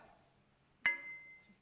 weights hitting each other